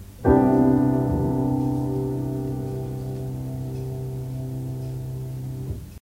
Piano Chord C
Some snippets played while ago on old grand piano
chord, piano